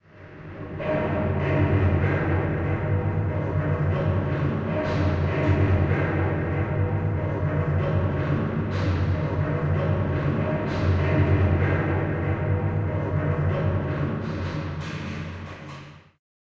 reverbed movement
A heavily processed and reverberant of some heavy movement in a "metallic" space. Created for a stage play.
echo, footsteps, metallic, movement, reverb